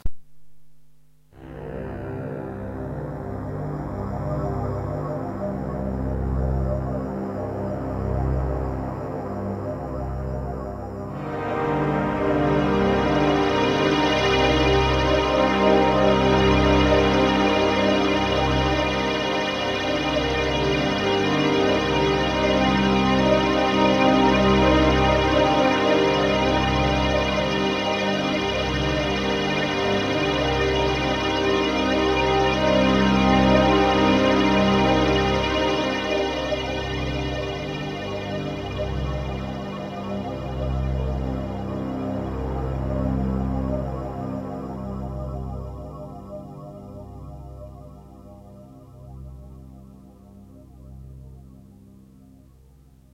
moving strings
Sampled impression of one of the sounds I made on my Roland D50.
D50, Synthesizer